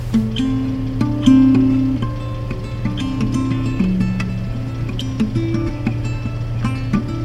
Guitar Strumming (Semi-Truck Background noise)
Strumming of a guitar with the noise of the Peterbilt engine rumbling in the background.
guitar-chords, distorted, rhythm, distorted-guitar, chords, rhythm-guitar, distortion, guitar